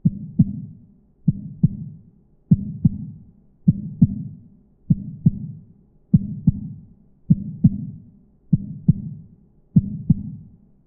Simple heart beat sound I made for anyone to be able to use in any project 100% free.